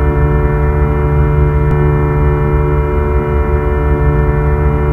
Created using spectral freezing max patch. Some may have pops and clicks or audible looping but shouldn't be hard to fix.
Atmospheric, Background, Everlasting, Freeze, Perpetual, Sound-Effect, Soundscape, Still